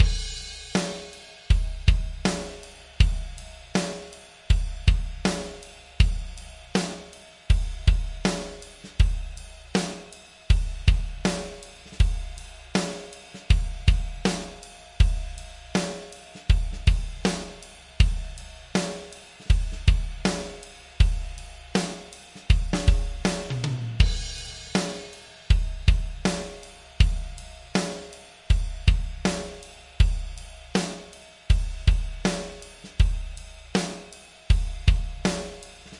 Song3 DRUMS Do 4:4 80bpms
beat, loop, bpm, 80, HearHear, Do, blues, Drums, Chord, rythm